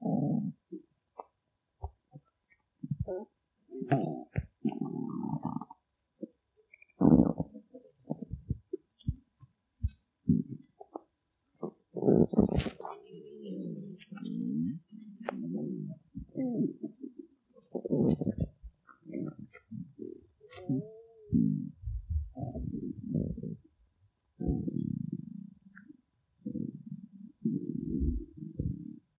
Phone recorded stomach noises, cleaned up using audacity. Loopable & has silence between noises, so it should be pretty easy to cut.